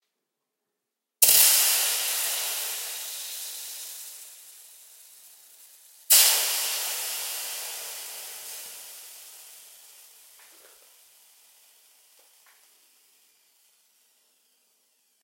water evaporating on hot surface
Some water spilled on a very hot surface, good for sounds of machines, trains and hot things
Did you like this sound?